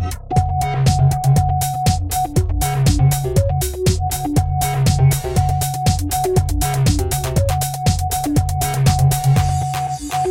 Metallic Beat 3
A complex beat that sounds metallic.